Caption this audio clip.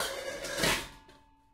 pots n pans 13
pots and pans banging around in a kitchen
recorded on 10 September 2009 using a Zoom H4 recorder
kitchen, pans, pots, rummaging